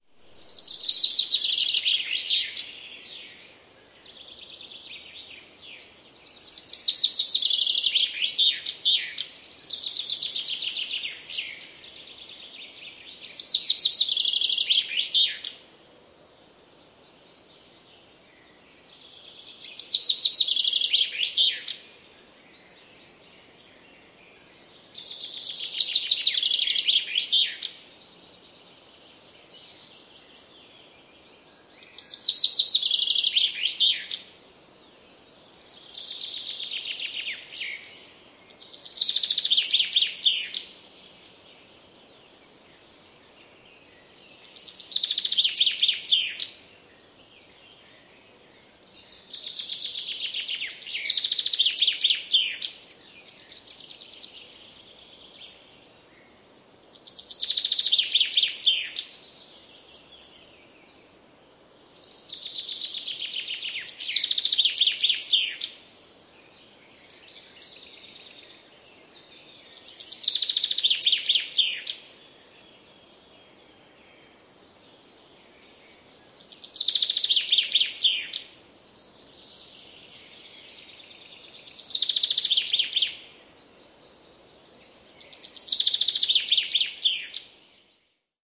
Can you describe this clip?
Peippo laulaa metsässä, kesä / Scaffinch singing in the forest
Peippo laulaa etualalla, taustalla kauempana muita peippoja ja pikkulintuja, metsän huminaa.
Paikka/Place: Suomi / Finland / Kitee, Kesälahti
Aika/Date: 28.05.1990